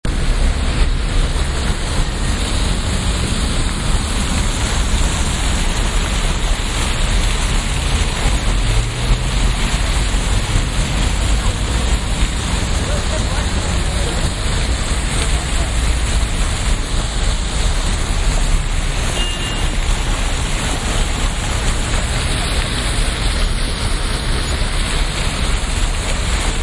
Sloane Square - Fountain in middle of square